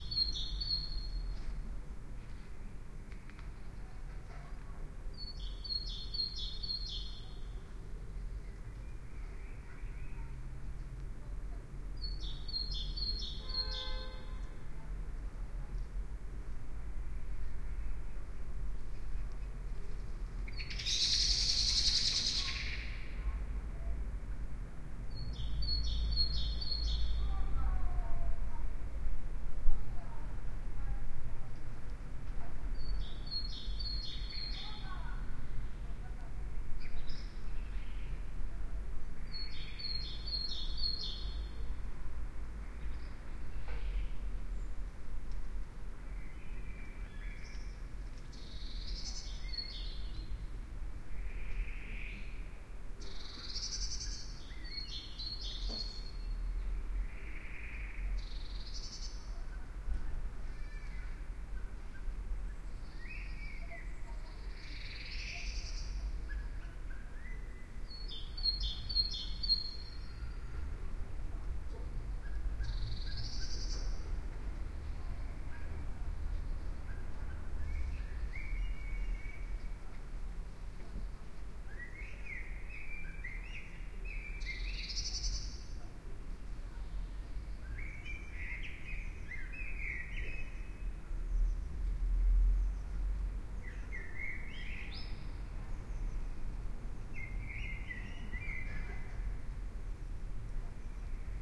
binaural April evening
Just a short track to test those AEVOX binaural microphones with the Sony PCM-M10 recorder.
AEVOX, binaural, birdsong, city, field-recording, test